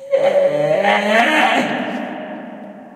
A yell with echo. Recorded inside the old cistern of the Reina (Badajoz province, S Spain) castle. Primo EM172 capsules inside widscreens, FEL Microphone Amplifier BMA2, PCM-M10 recorder.
reverb, underground, creepy, echo, cave, cavity, scream, basement, dungeon, field-recording